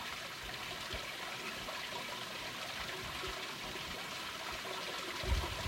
Water flowing into a drain 1
Recorder water going into a drain from a small fish pond. Can be useful also as a stream sound or small waterfall. (Check out my music on streaming services too - search for Tomasz Kucza.)
stream, brook, liquid, river, drain, babbling, waterfall, creek, water, flow, flowing, splash